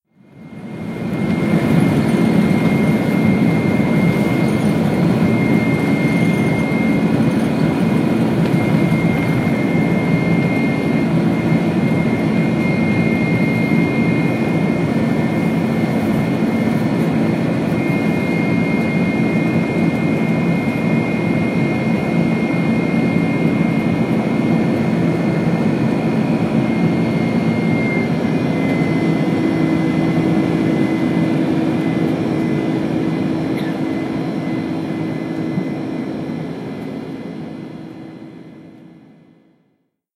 A plane launching. Recorded with an iPhone.